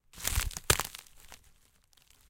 pile of boughs and branches breaks, close up, H6
cracking, breaking, snapping, break, wood, crackle, close-up, tearing, crack, short, rotten